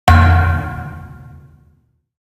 VSH-29-knuckle-thump-metal pipe-med
Metal foley performed with hands. Part of my ‘various hits’ pack - foley on concrete, metal pipes, and plastic surfaced objects in a 10 story stairwell. Recorded on iPhone. Added fades, EQ’s and compression for easy integration.
crack, fist, hand, hit, hits, human, kick, knuckle, metal, metallic, metal-pipe, metalpipe, percussion, pop, ring, ringing, slam, slap, smack, thump